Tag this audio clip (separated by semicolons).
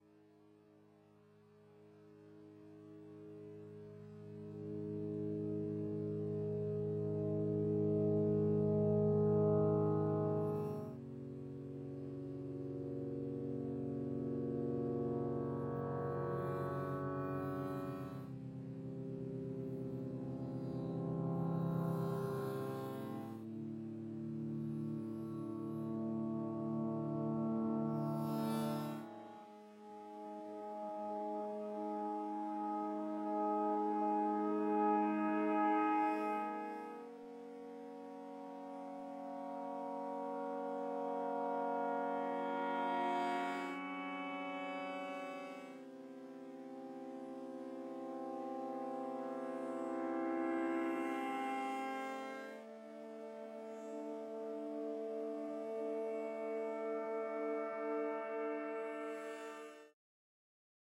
experimentalaudio; f13; FND112